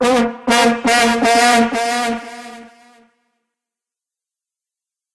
VUVUZELA Sound
Sound of Vuvuzela
Vuvuzela, Recording